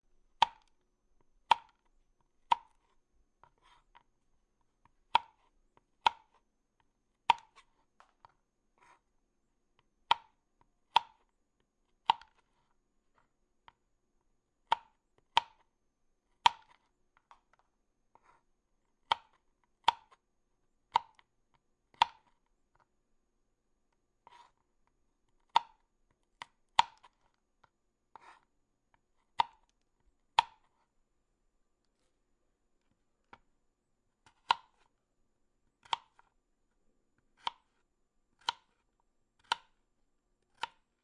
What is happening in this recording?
Cutting Almonds with Knife
Cutting almonds with a knife for breakfast.
cooking, cut, Cutting, food, kitchen, knife, nuts, slicing, vegetables